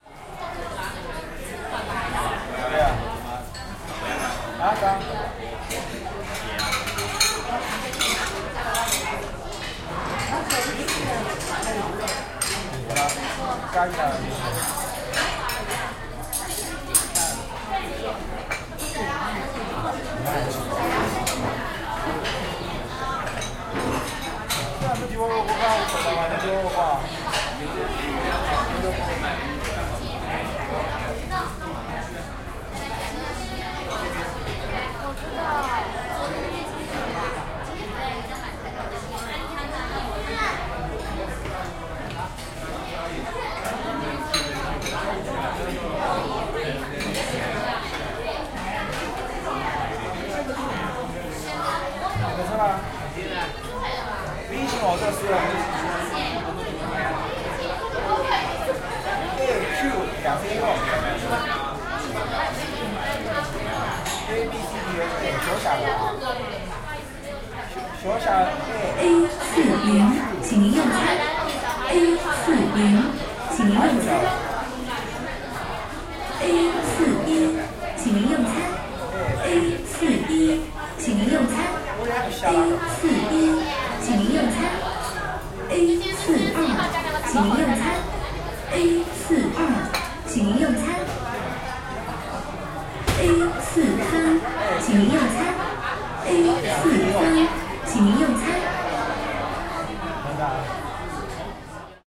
huinan busy restaurant
This is a busy restaurant in suburban Shanghai with diners talking, plates and glasses being moved and an electronic announcement system.
glasses, voices, restaurant, Asian, China, Shanghai, Chinese, ambience, suburb, Nanhui, diners, plates, field-recording, meal, eating